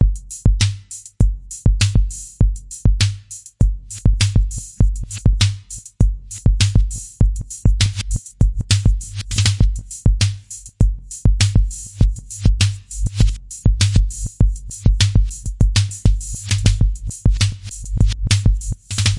100bpm 808-like drum loop
A 100bpm 4/4 syncopated drum loop made with a virtual 808-like synthesizer. 8 bars long, contains some reverb, compressed and limited.
100bpm, drum, drumloop, electric, loop, synth